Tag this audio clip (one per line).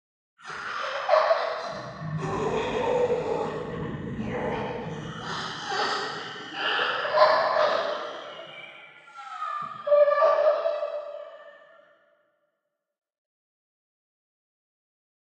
Alien
Attack
Creature
Effect
Fantasy
Ghost
growl
halloween
Horror
Monster
Mystery
Roar
Scary
Scream
Vocal
Voice
Zombie